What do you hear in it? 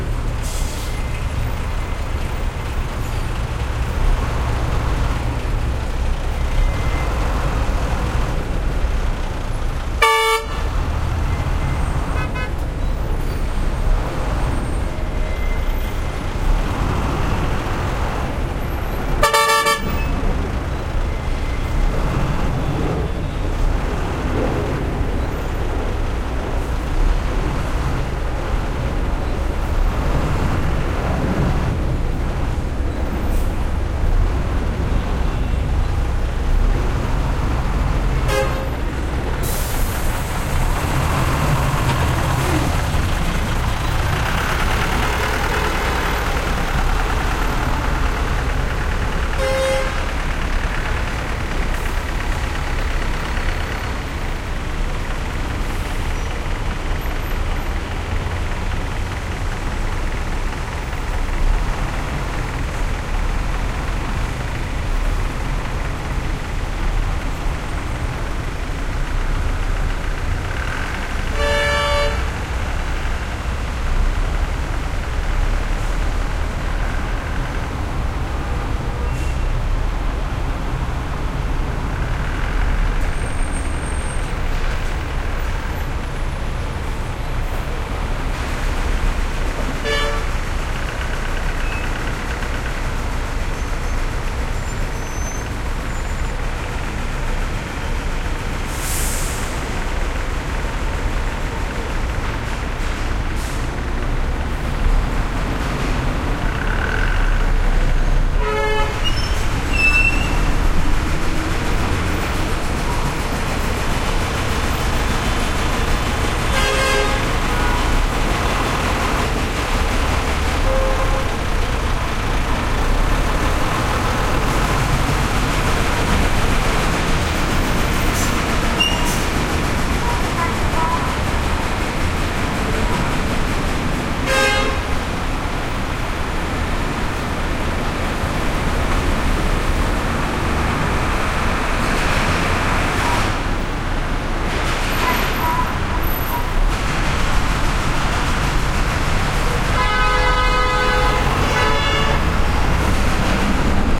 traffic heavy trucks busy boulevard close Beijing, China
boulevard, busy, China, heavy, traffic, trucks